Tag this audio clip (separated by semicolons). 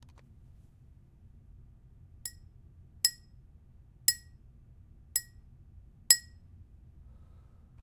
tap; Glass